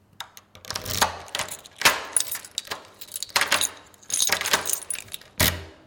unlock door mono
unlock a door with a key
door, key, unlock